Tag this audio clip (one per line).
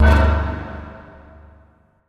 bell
hit
xjhall